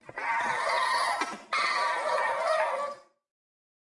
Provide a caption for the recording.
Excited Dog Modification
I made the sound of my dog growling to one somewhat excited.
Dog, edit, excited